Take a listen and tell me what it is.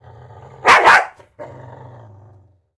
Animal Dog Bark And Growl 01
Animal Dog Bark And Growl
Bark, And